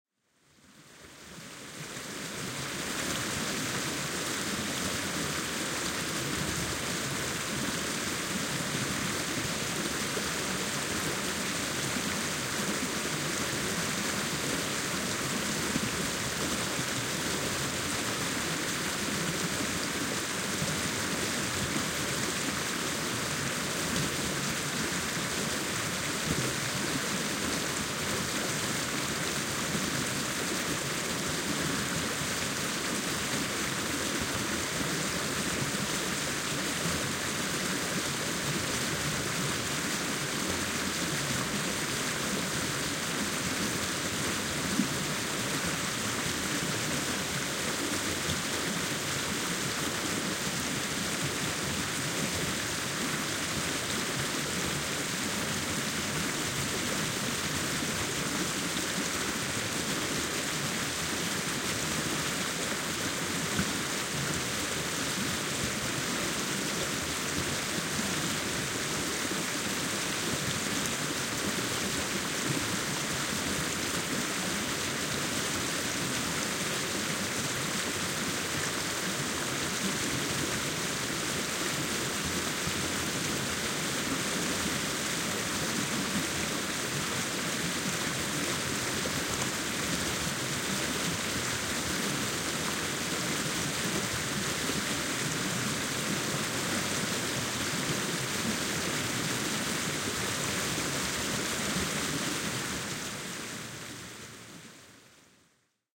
HC Bridge Spaced Omni's
A stereo recording using a pair of spaced omni's positioned around 30cm from the waters surface by a boom from the bridge. The mics were positioned centre of a concrete pillar with a different exit of the bridge to left and right giving good separation of water flow.
bridge, field-recording, river, valley, water, yorkshire